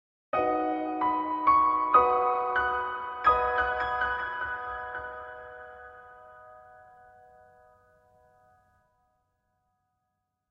A small classical opening phrase.